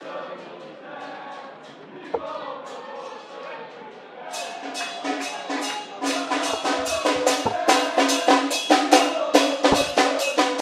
TRATADA190127 0787 torcida organizada 2
Radio Talk - Stadium - Recording - Soccer - Ambience
Ambience, Radio, Recording, Soccer, Stadium, Talk